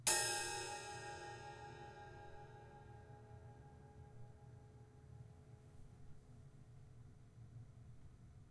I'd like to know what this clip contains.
Drum Cymbal being hit
cymbal, drum, kit